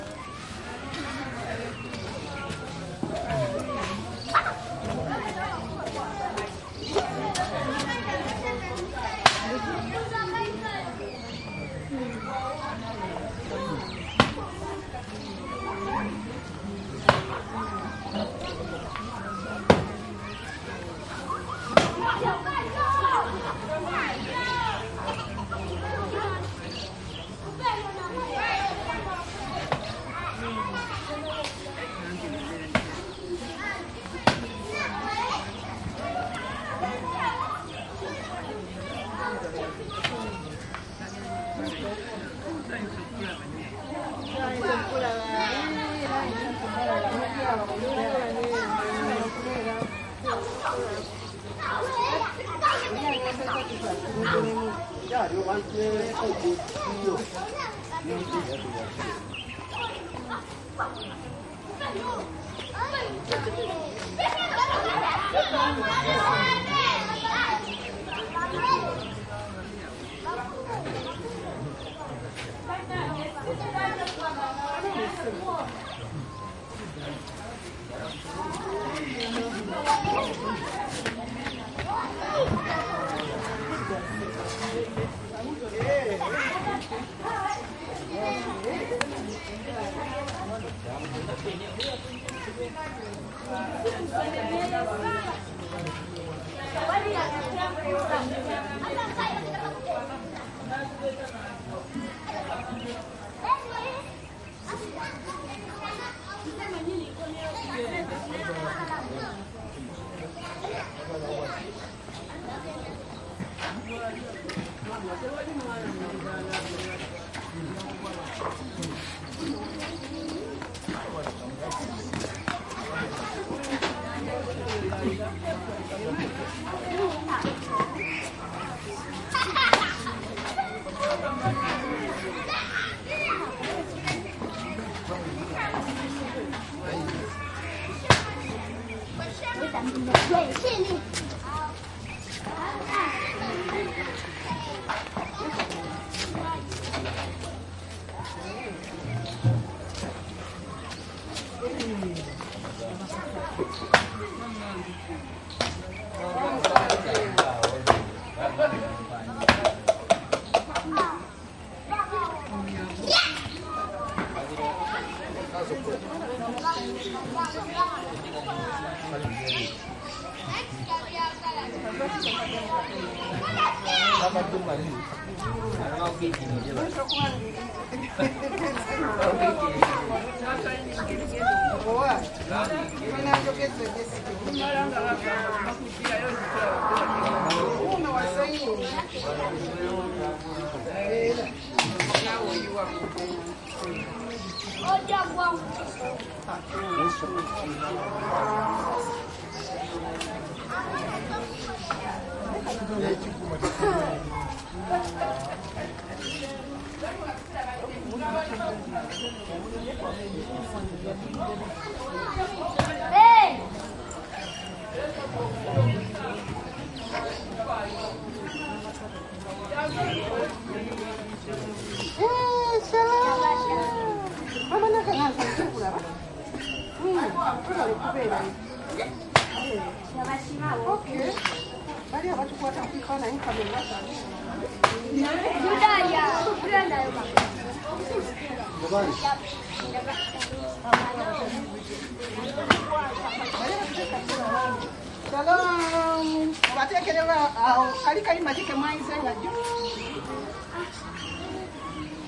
village close activity voices steps with soft distant traffic Uganda, Africa
steps, Africa, activity, village, close, voices, Uganda